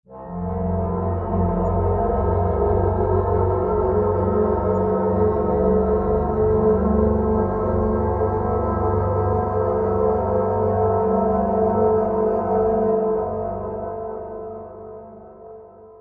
Horror Sounds 10
Ambiance, Atmosphere, Creepy, Dark, Evil, Horror, Scary, Sinister, Sound, Spooky, Thrill